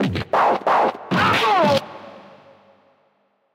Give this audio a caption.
Remix parts from My Style on Noodles Recordings.